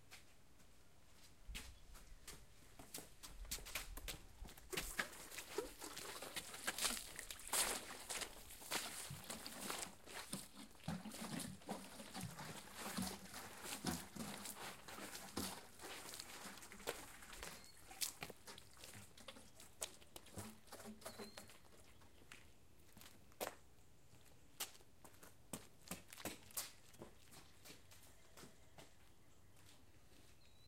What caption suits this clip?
Run and Splash 03
Running and then splashing water out of a garden pitcher. Meant to sound like splashing gas from a gas can.
gas, run, splash, water